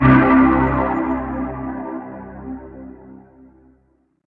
warm basssynth 4003
Just something that gives one the same comfortable, warm feeling when listening to it. I have tried to obtain a synthbass sound which is warm and slightly overdriven. Listening and watching the video on the link, I wanted something that sounded like it was coming from vintage speakers and valve based synths.These samples were made using Reason's Thor synth with 2 multi-wave oscillators set to saw. Thor's filter 1 was set to 18dB Low pass, Thor's waveshaper was used to provide a touch of soft clip followed by Filter 2 also set to low pass.
warm, analogue, synthbass, vintage, synth-bass